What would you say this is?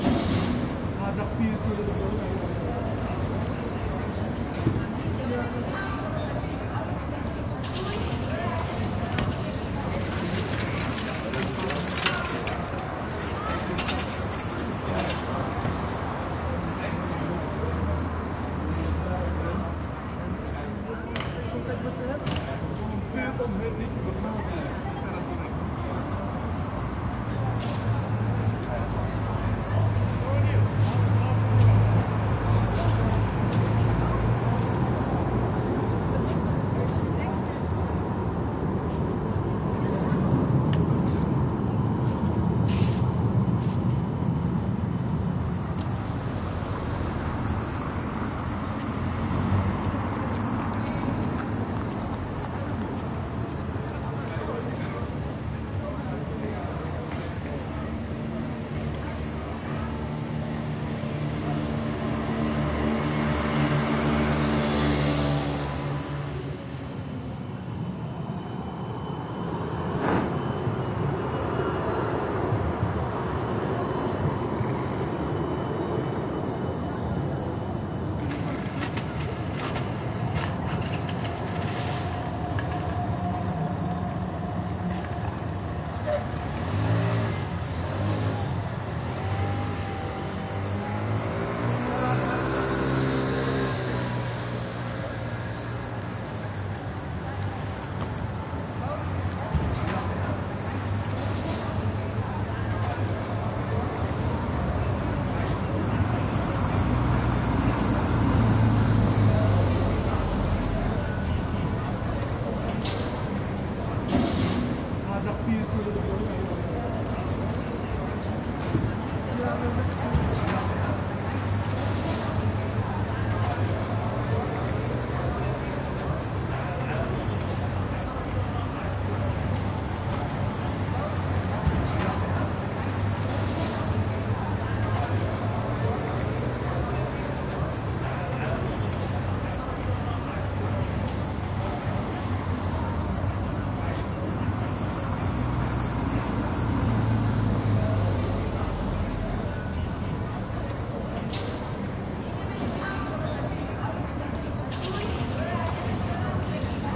Quiet evening in the East of Amsterdam, street noises, tram passes by. Recorded with a Sony PCM-D100. It is a loop.